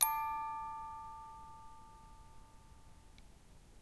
one-shot music box tone, recorded by ZOOM H2, separated and normalized
bell, box, tones